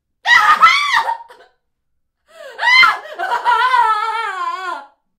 woman screaming in desperation dramatic intense
desperation, woman, intense, screaming, dramatic